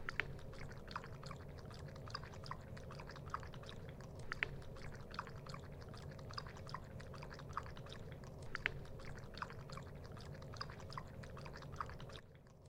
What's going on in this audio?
The sound was created when a dog lapped up her water. The sound has been amplified in Pro Tools.